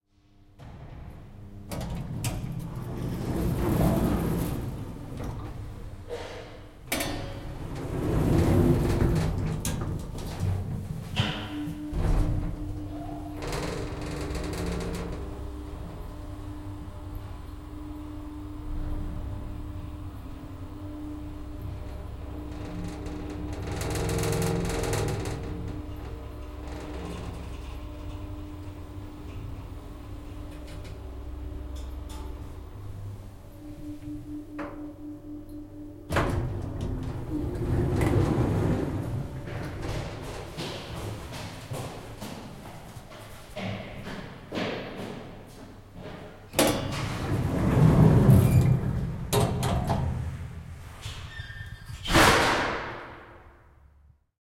Noisy Old Elevator
Riding an old and noisy Freight-Elevator.
The door closes loudly, the mechanism makes a bunch of noise as the elevator moves upwards until it reaches its destination and the doors open again, followed by a running person's footsteps echoing though a hallway and a door falling shut.
Metal, Engine, Generator, Freight-Elevator, Noise, Doors, Freight, Industrial, Weight, Factory, Noisy, Squeak, Shaking, Elevator, Turbine, Rust